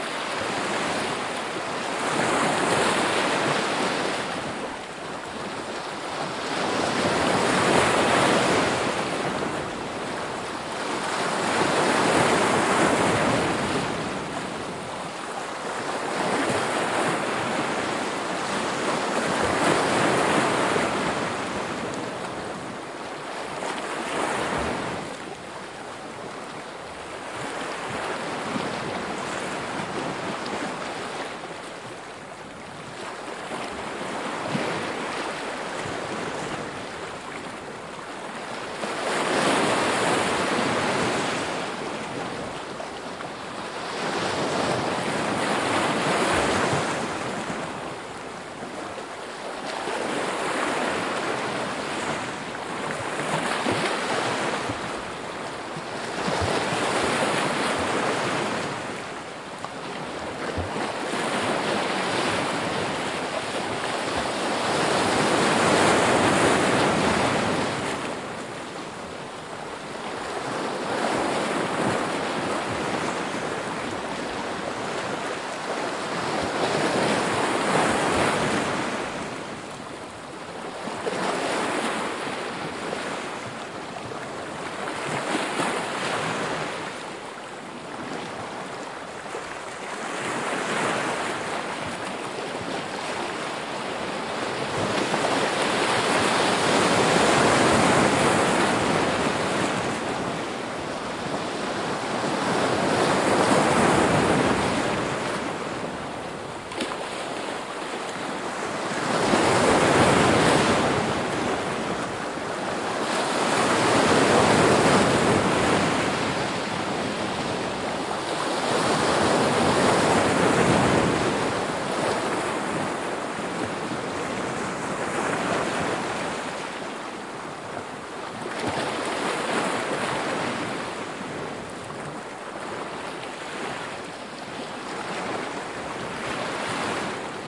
AMB Dafni Beatch Zakynthos
Seashore ambience. Medium ocenwaves.
Ambience, Atmosphere, Oceanwaves, Seashore